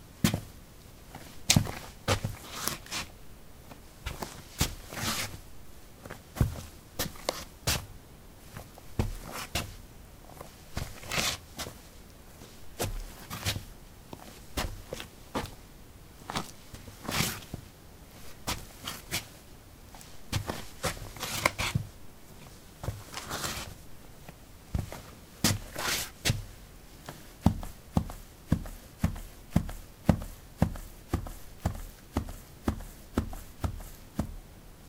soil 16b trekkingshoes shuffle tap
Shuffling on soil: trekking shoes. Recorded with a ZOOM H2 in a basement of a house: a wooden container placed on a carpet filled with soil. Normalized with Audacity.
walk, step, walking, steps, footstep, footsteps